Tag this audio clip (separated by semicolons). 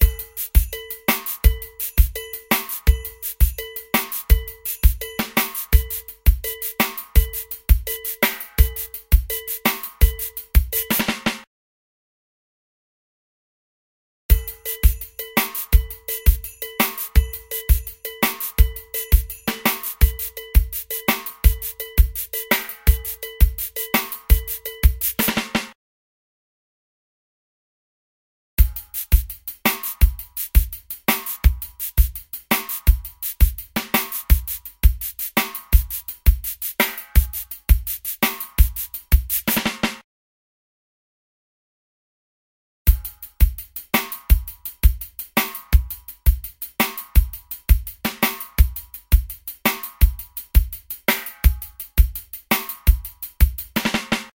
drum-loop; drums; groovy; percs; percussion-loop; percussive; quantized; sticks